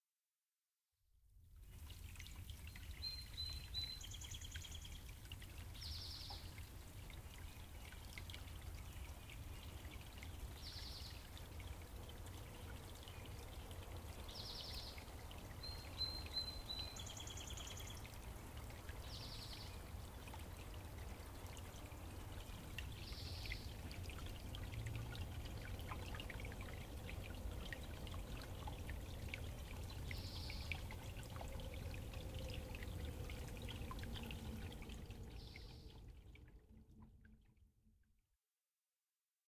Small suburban stream with birds
A small stream recorded in a residential park with birds and ambiance. Distant traffic can be heard in the background.
Recorded with an H4n recorder and Shure SM63LB omnidirectional mic.
nature, ambient, brook, field-recording, city, stream, ambiance, suburban, water